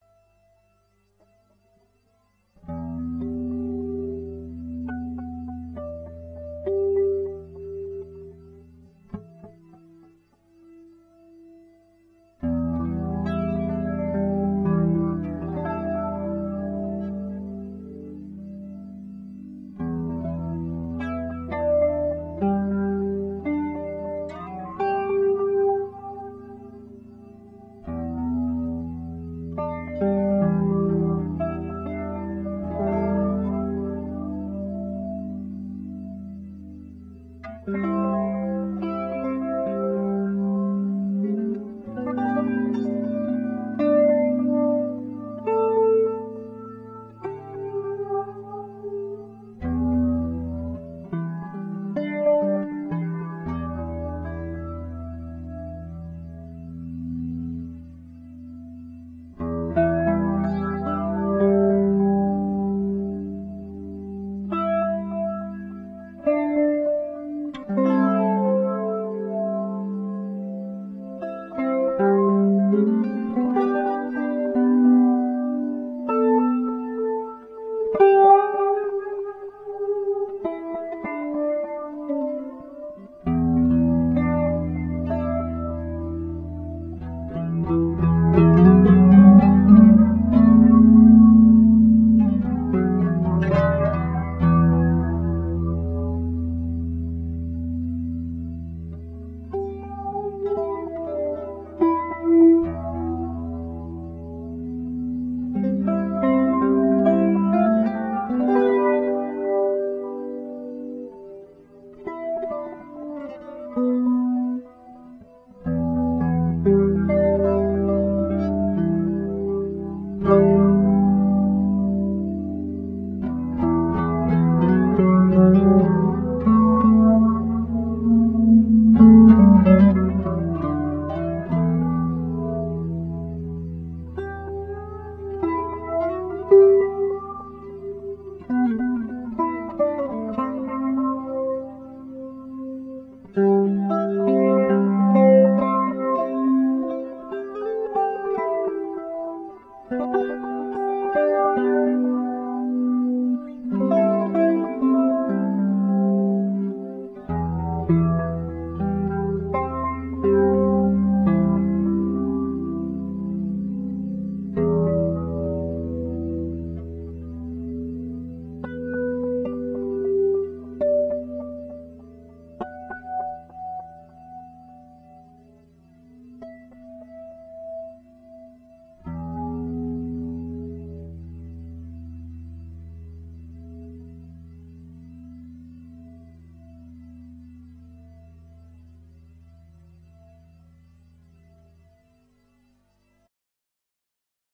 Darck Water
This is improvisation guitar song, whith using delay and Guitar Rig effect "Water" slow and atmosphere music